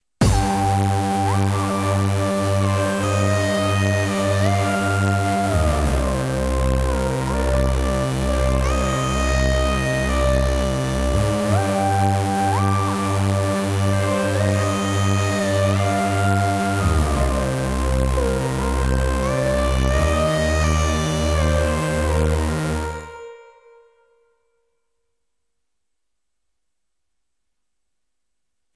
01-THE CERTAINTY 1
Another part of an unfinished tune i first wrote.
compose, tune, write